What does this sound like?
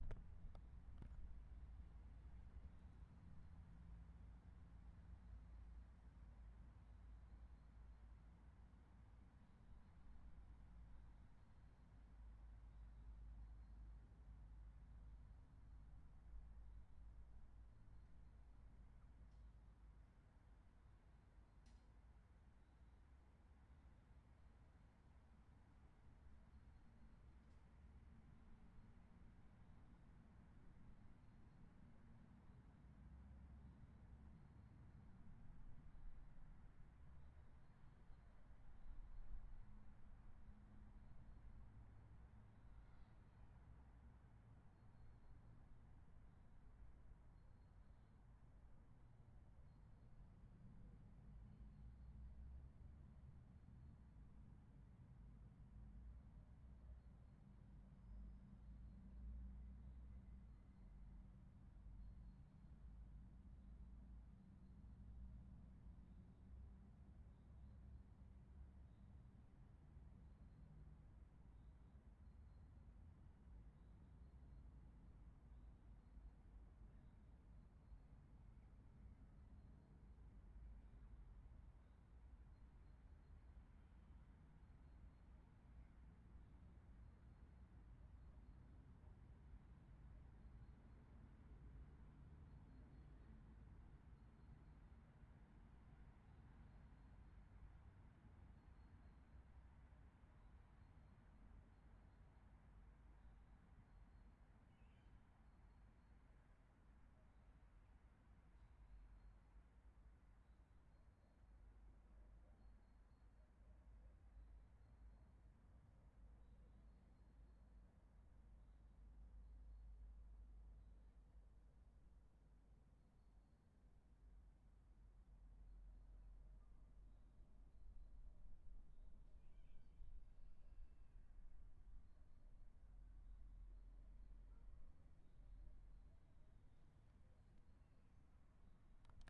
Park Szczubelka Ulica Daleko
park, the city in the background, in the distance a busy street
a, background, busy, city, distance, park, street